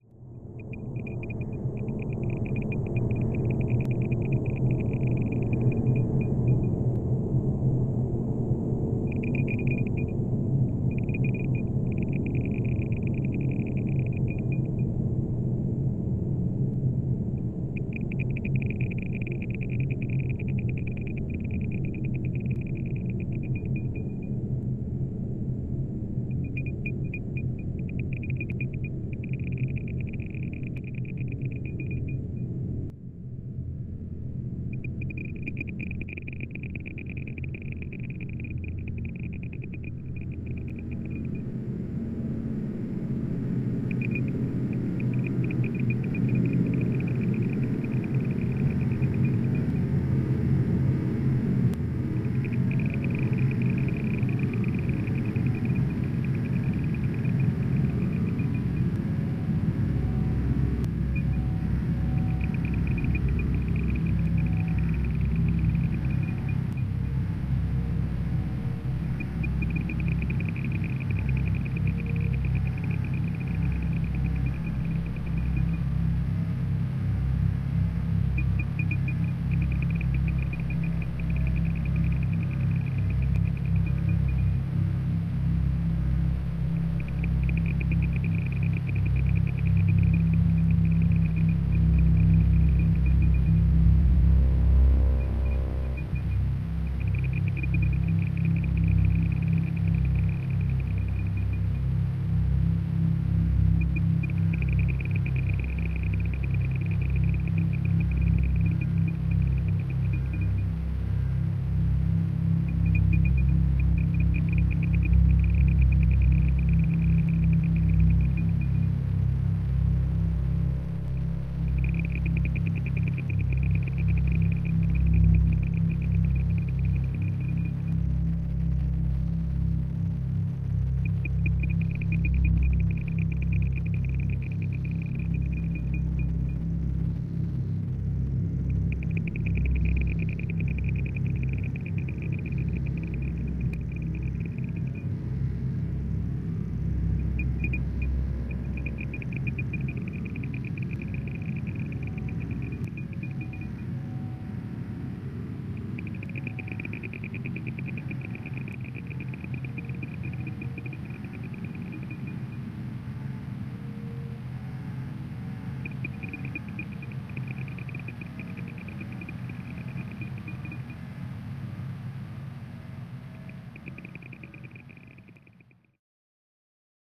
dark-ambient-layered-atmosphere
Dark drony ambience
isolation, suspense, creepy, void, drone, deep, arctic, ambience, dark